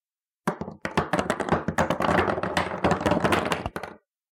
cork drops
The sound of falling some wine corks on the table
fall, objects, small, falling, drop, cork, drops